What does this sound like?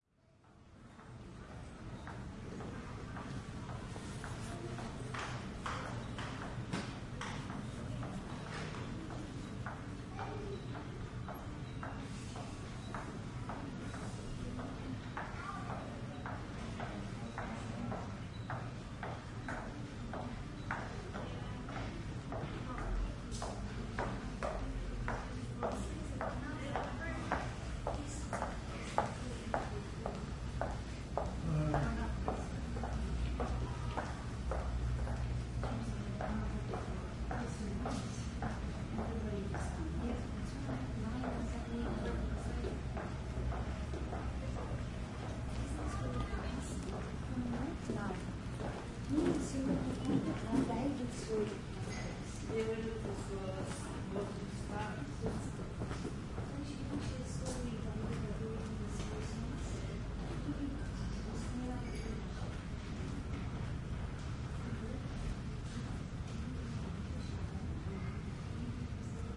Airport Passage Brisbane 3 FootSteps
Ambient sounds of people passing on a hard floor in a long passage between a terminal and the main airport lobby. Recording chain: Panasonic WM61-A microphones - Edirol R09HR
airport, walking, foot, steps, people, crowd